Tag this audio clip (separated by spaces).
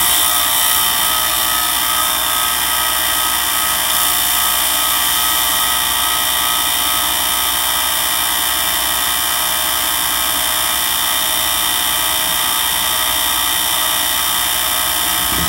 buzzing droning electronic hum machine vcr